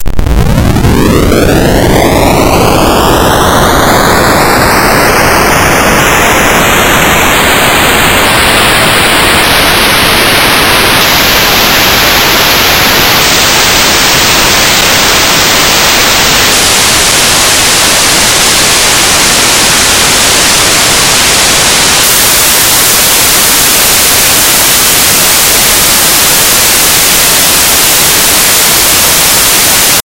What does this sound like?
This kind of generates random values at a certain frequency. In this example, the frequency increases.The algorithm for this noise was created two years ago by myself in C++, as an imitation of noise generators in SuperCollider 2. The Frequency sweep algorithm didn't actually succeed that well.